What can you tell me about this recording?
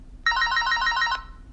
cellphone ringing
singular cellphone ring
Phone, ring-tone, ringing, cellphone